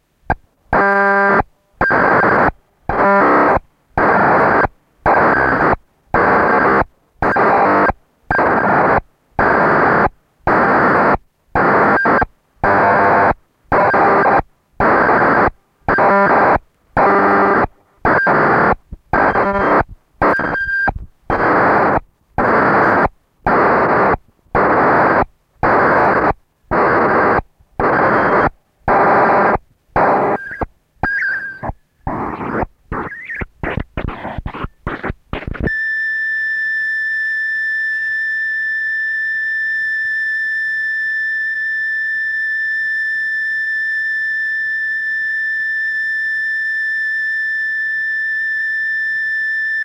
sound from a gdr robotron data cassette